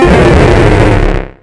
sound effect for game